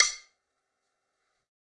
Sticks of God 019
drumkit, god, stick, drum, real